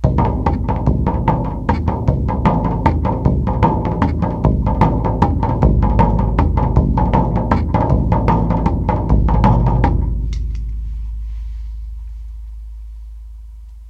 beat variations, three drums, own designs
prototypes, experimental, music, drum